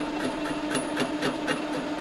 recordings from my garage.
industrial, machine, metal, tools